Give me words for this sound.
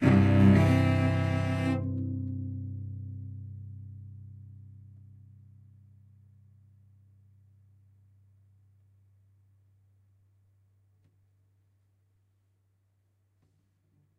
Bowed note on cello
bow, cello